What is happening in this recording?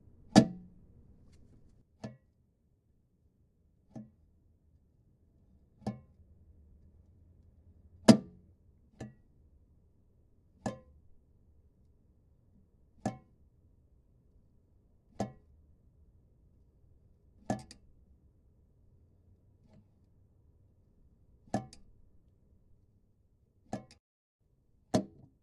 Gas Door
A gas hinge being pushed closed numerous times and being shut once or twice.
door, gas, hinge, metal, swinging